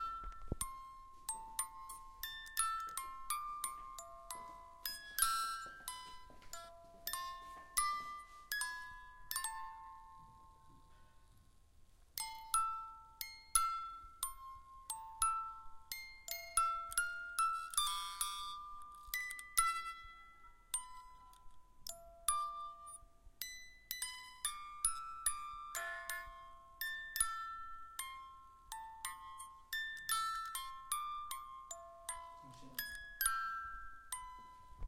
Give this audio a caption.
Song of an old alarm for babies.
Recorded with Zoom H4.